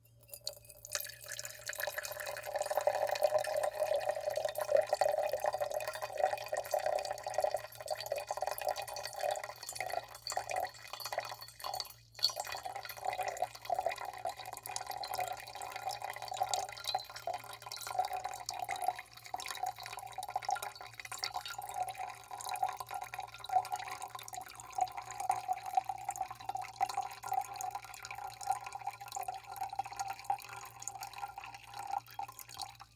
Slow Trickle into Container FF351
Liquid slowly trickling into container, liquid hitting hard surface
trickling, liquid, container